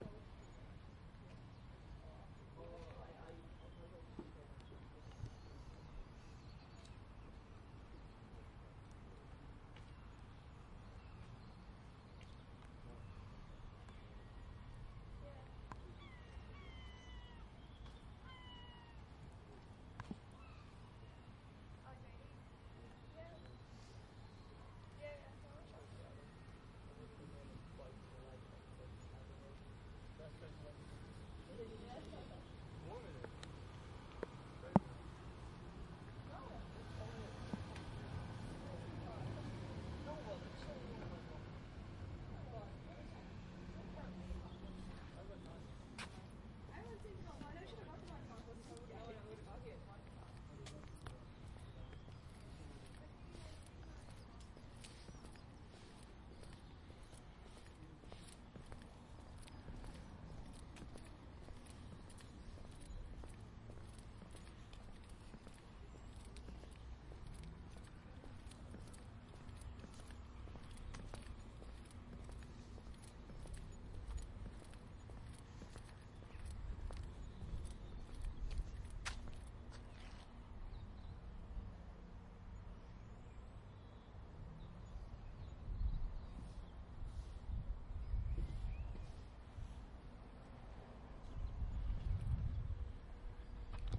recording of outside ambience
ambient, breezy, car, chatting, field-recording, outside